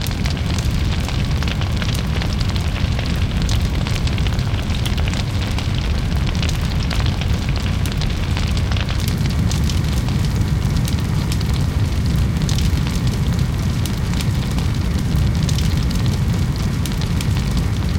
ELEMENTS FIRE 02 Two-Stages
Sound created for the Earth+Wind+Fire+Water contest
This is the sequel of ELEMENTS_FIRE_01_Burning-Room file
it can be considered like the successive stage
here the fire turns out more intense and the positioning of the microphones differs
the file was splittetd in 2 parts separated from a marker,
every part goes perfectly in loop
and defers for intensity and things that are burning in that moment
Diferences also modulated with the use of dynamic effects (eq, compression)
and panning. It could be useful to score a particularly 'warm' movie ; )
burning, fire, fx, noise, texture